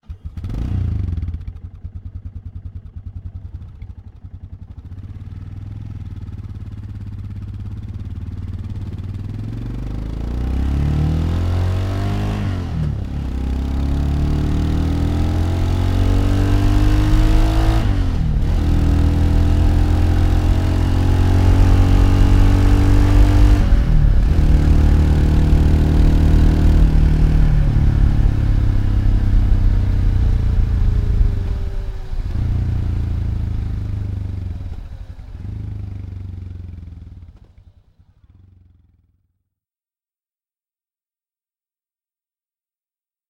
single cylinder moto engine
Pack of sound test signals that was
generated with Audacity